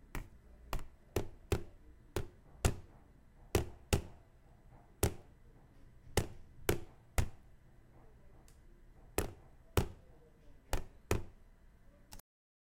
Plastic Hits:Knocking
A plastic knocking sound.